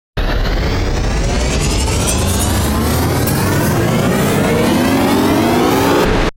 Spaceship whoosh 2
"Charging" type of sound. Could be used for spaceship taking off/entering hyperspace or some sci-fi machinery powering up. Made with Pure Data and Ableton.
charge, noisy, energy, takeoff, sound, generator, canon, plasma, spaceship, engine, charging, futuristic, sci-fi, design, laser, build-up, whoosh, weapon